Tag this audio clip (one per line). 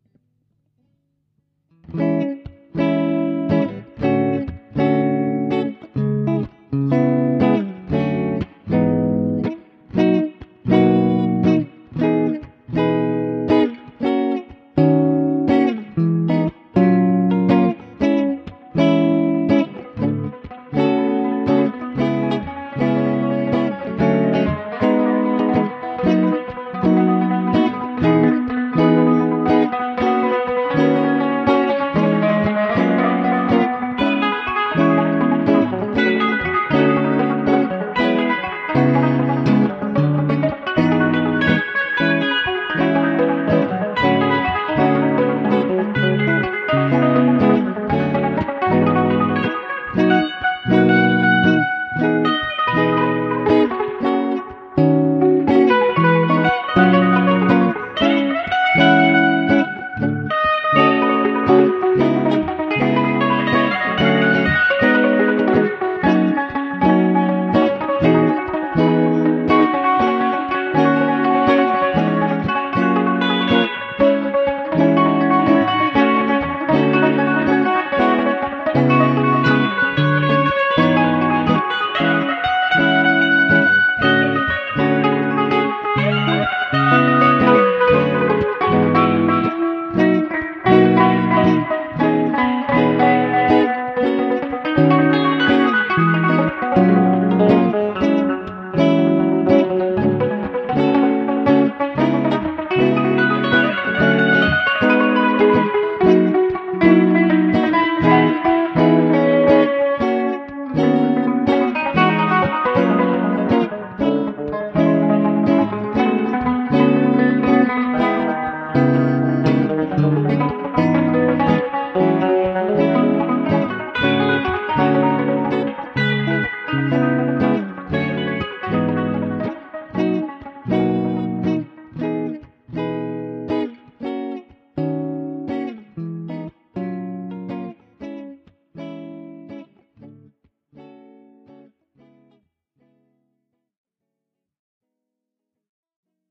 ambient
chords
echo
electric-guitar
Experimental
guitar
instrumental
Jazz
melodic
music
offbeat
Psyhodelic
reverb
reverberation
solo
string
syncopation